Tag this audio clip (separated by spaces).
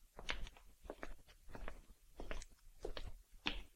floor foley footsteps shoes steps tiles walk walking